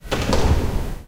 While recording some birds with a Zoom H2, a gate was opened in the courtyard at night.
big, castle, courtyard, door, force, forced, gate, lair, locked, locking, night, opening, powerful, slam